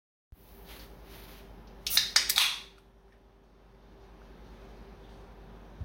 Can open
Cracking open a can
beer, beverage, bottle, can, coca, coke, cola, drink, fizz, open, opening, soda